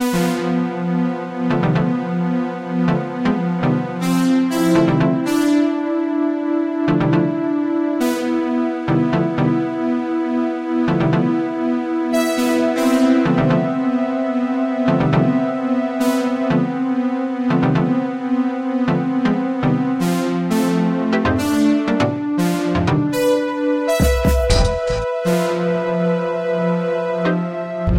Short piece of music to be played on background to show something scary.